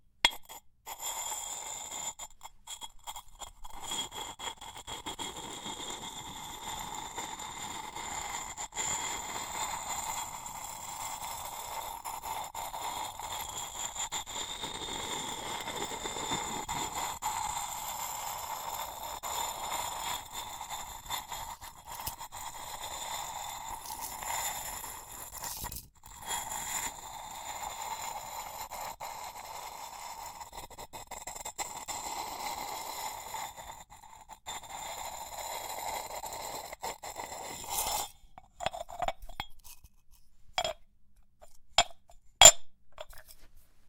ceramic friction small clay pot lid turn slowly grind
friction, turn, small, ceramic, slowly, pot, lid